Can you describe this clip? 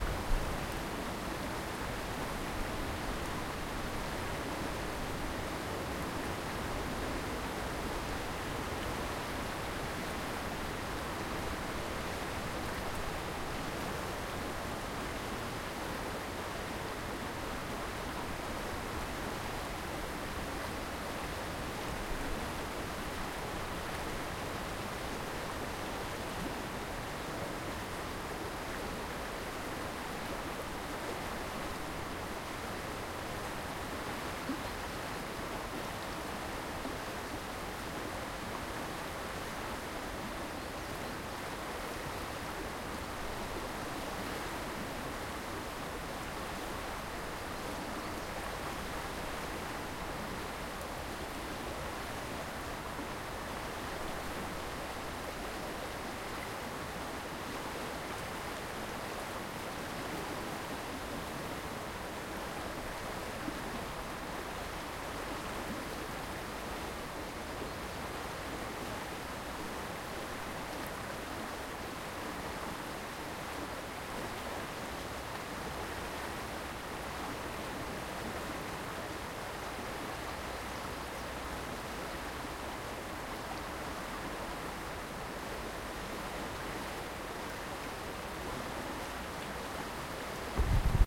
Wind in trees beside river distant birds
field-recording, water, wind, river